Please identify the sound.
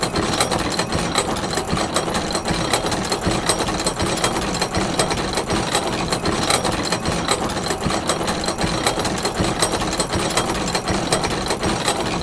gear-spinning-loop
An astronomical clock recorded close-up.
Original sound:
tools, close-up